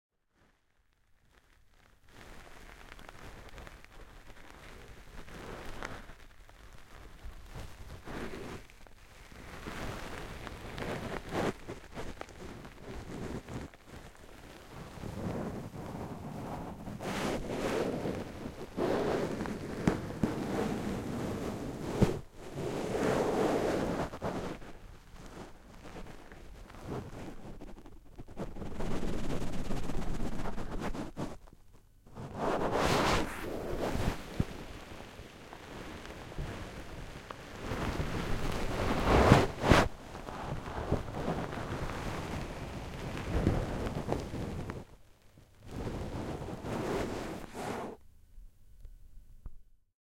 Fabric Rustling
Making noises with textile. Recorded in XY-Stereo with Rode NT4 in Zoom H4.
cloth, clothing, fabric, nails, rustling, sand, scratching, texture